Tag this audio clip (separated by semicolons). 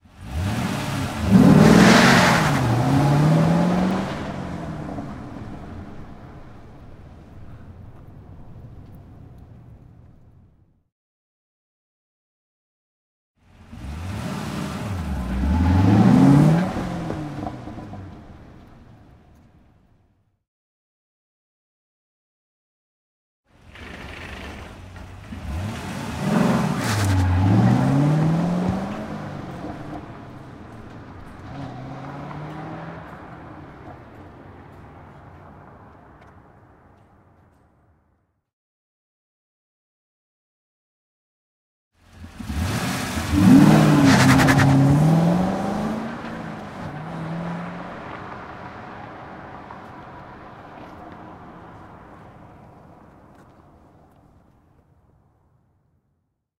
car; auto; rev; peel; away; pull; performance; fast